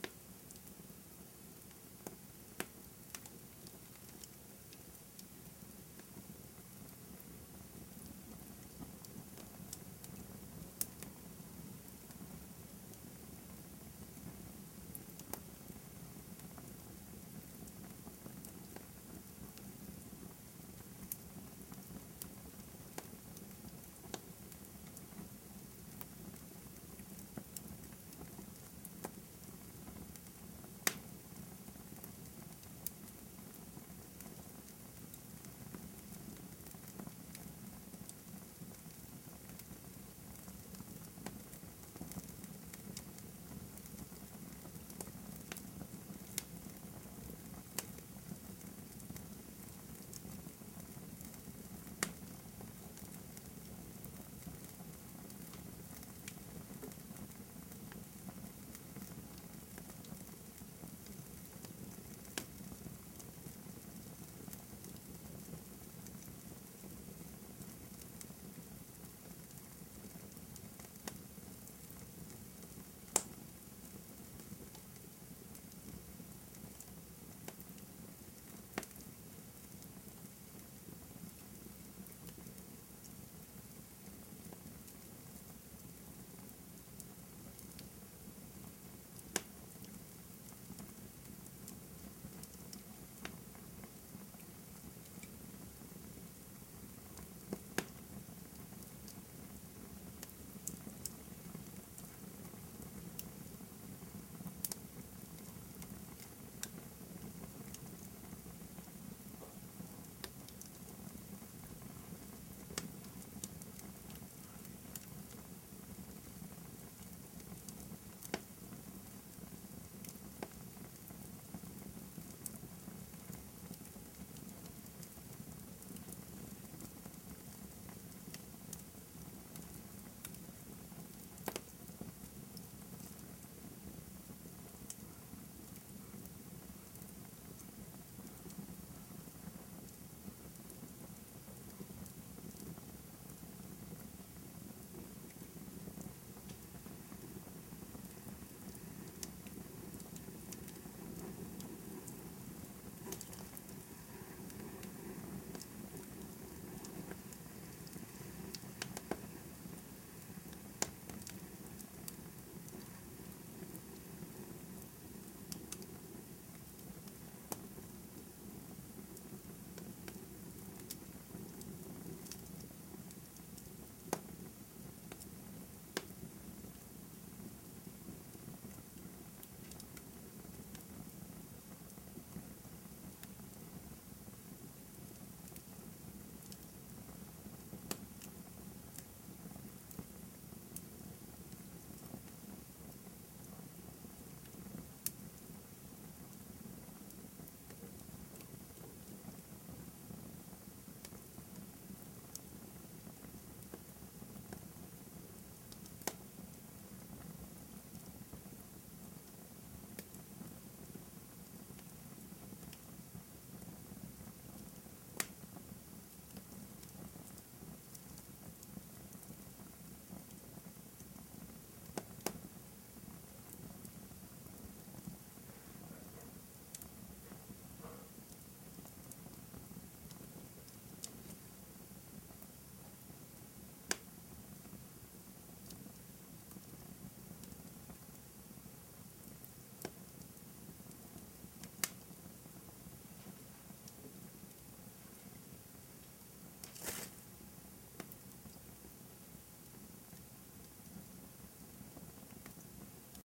fireplace-jim
A small to medium fire in a fireplace. Room ambiance, slight hiss from wet log, cracks and pops throughout. Thanks.
fireplace, fire